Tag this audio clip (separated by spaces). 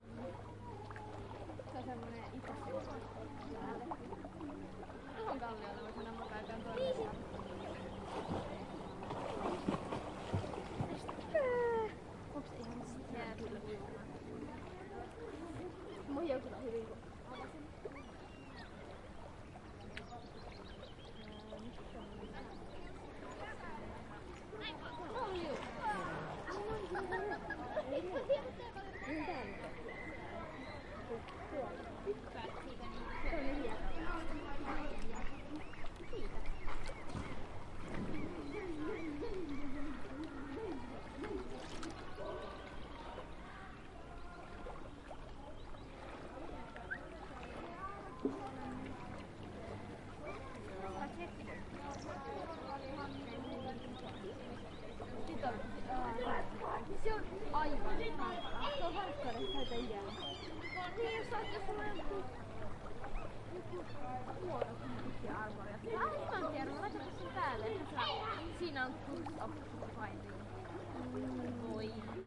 beach lake field-recording lakeside swimming people water nature picnic